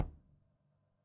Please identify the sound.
Drumkit using tight, hard plastic brushes.
bass-drum, bd, kick, light, tap